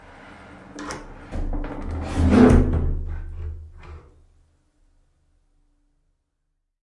Falltuer schlieszen
My "Falltuer Samplepack" deals with the mysterious sounds i recorded from the door to the loft of our appartement :O
The Recordings are made with a Tascam DR-05 in Stereo. I added a low- and hipass and some fadeouts to make the sounds more enjoyable but apart from that it's raw